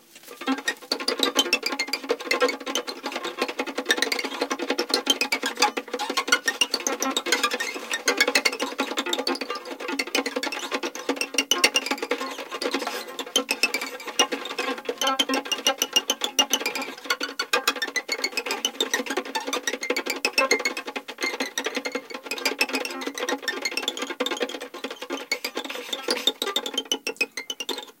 random noises made with a violin, Sennheiser MKH60 + MKH30, Shure FP24 preamp, Sony M-10 recorder. Decoded to mid-side stereo with free Voxengo VST plugin.